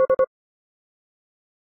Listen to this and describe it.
3 beeps. Model 2